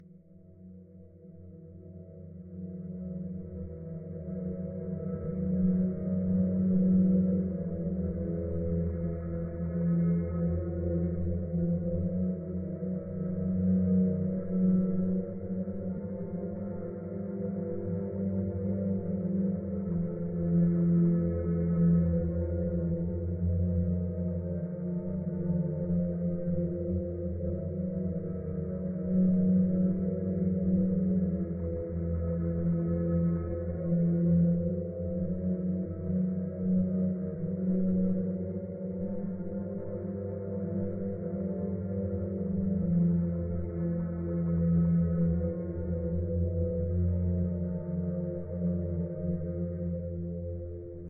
I made this ambient in audacity
dark, ambience, smooth